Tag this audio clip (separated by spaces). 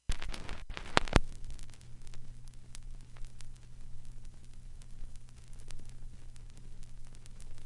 phonograph noise record popping scratch vinyl